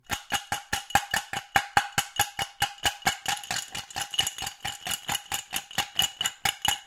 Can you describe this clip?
Ice Cubes Glass Shake 06
Ice cubes being shaken in a glass